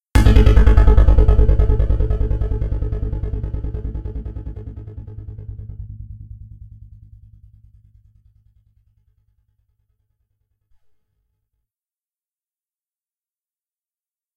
Sci-Fi Stinger
Sound created by taking one click from a click track and putting multiple effects on it in Pro Tools.